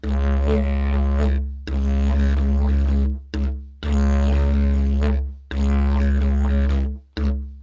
didge duwiduwi 2
Rhythmic recording, Didgeridu (tuned in C). Useful for world music or trance mixes. Recorded with Zoom H2n and external Sennheiser Mic.
aerophone, didgeridoo, didgeridu, didjeridu, filler, loop, rhythm, wind, world-music